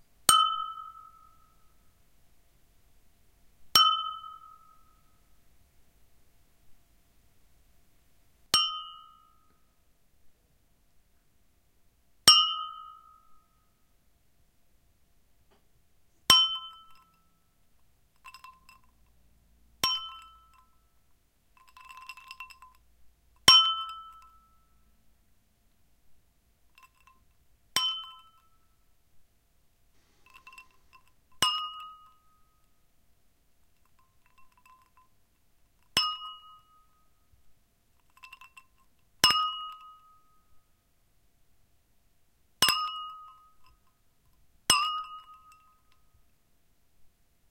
Glass Ding
Glasses dinging.
Recorded with Zoom H2. Edited with Audacity.
glass, ding, cling, wine-glass, liquid, iced-water, water, crystal, bang, toast, ting, ice-water, chrystal, collision, clang, iced